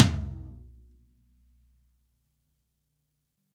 Low Tom Of God Wet 012
drum, drumset, kit, low, pack, realistic, set, tom